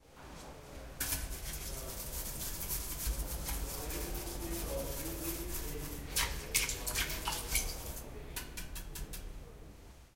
Perception of the sound: The process of cleaning toilet with a brush.
How the sound was recorded: Using a portable recorder (Zoom h2-stereo),with the recorder in one hand recording the brushing of the toilet.
where it was recorded? UPF Communication Campus taller's male bathroom, Barcelona, Spain.